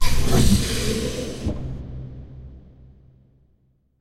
Shields power up sound created for a game built in the IDGA 48 hour game making competition. The effect was constructed from a heavily processed recording of a car door strut recorded with a pair of Behringer C2's into a PMD660.
computer, power-up